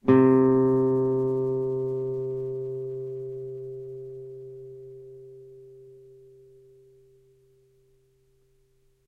C, on a nylon strung guitar. belongs to samplepack "Notes on nylon guitar".